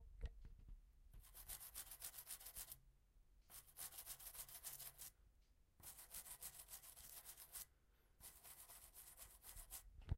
the sound of a toothbrush zoom h1